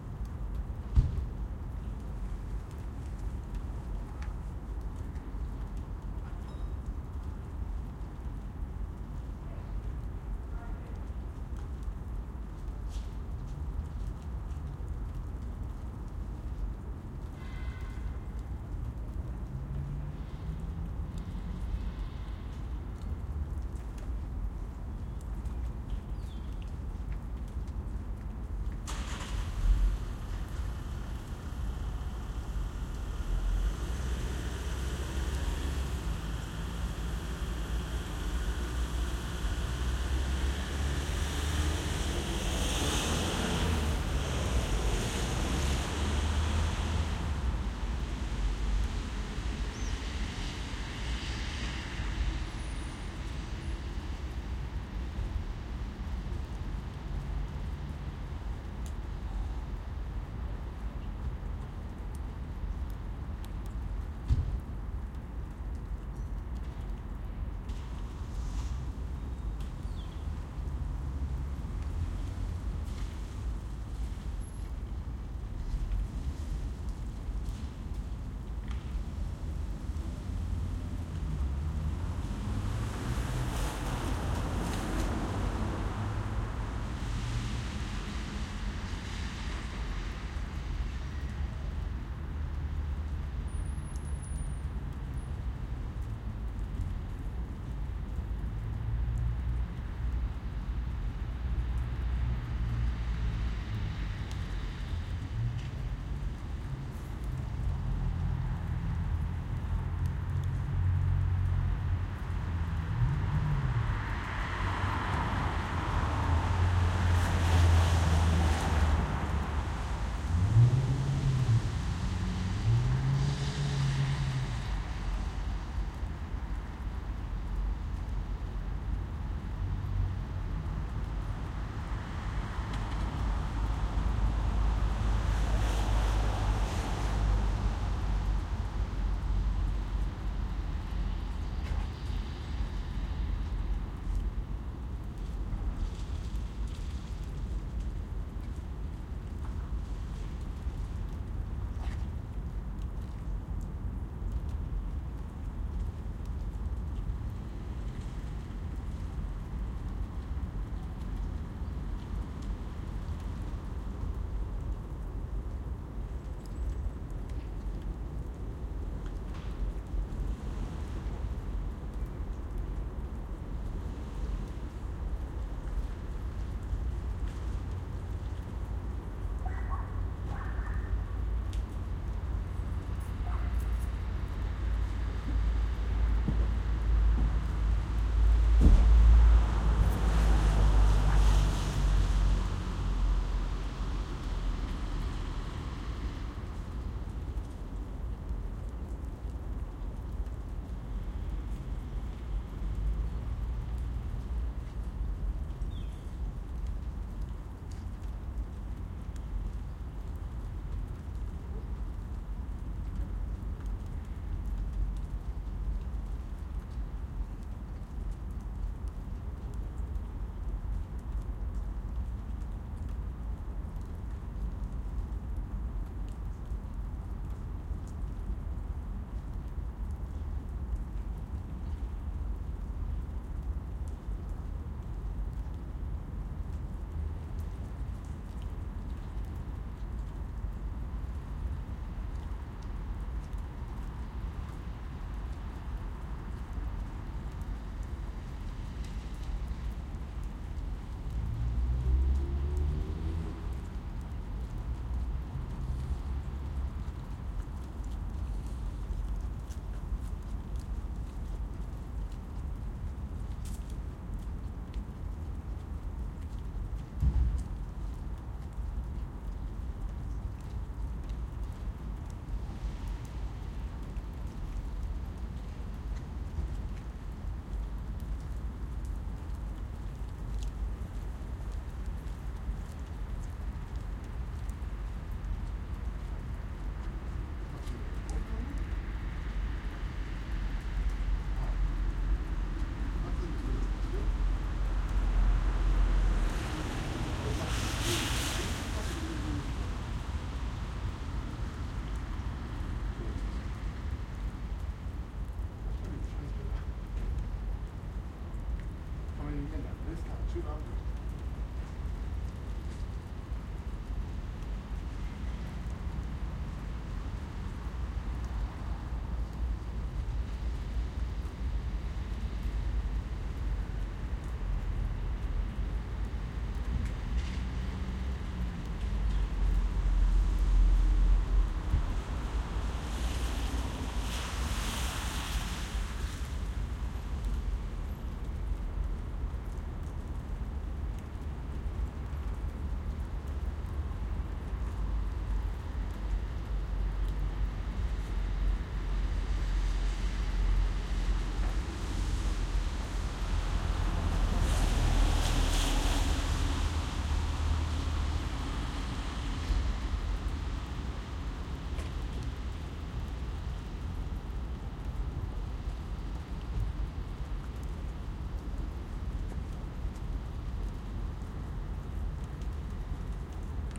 Semi-quiet street in city, cars passing by, Light rain and raindrops from trees, Distant traffic noise
Ambience of a quiet street in Hamburg with light rain, cars passing by frequently, sound of light rain and raindrops from trees, traffic noise in the distance is very audible.
Recorded with a ~30cm AB pair of Neumann KM183 Microphones on a Zoom H4.
atmo, cars, semiquiet, raindrops, hamburg, street, traffic, atmosphere, passing, city